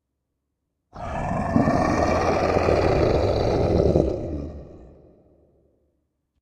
Deep Roar Echo 2
Deep Roar Creature Monster Echo
Roar, Monster, Creature, Deep, Echo